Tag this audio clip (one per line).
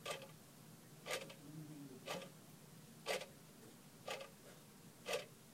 time
clock
tic
ticking
click
tick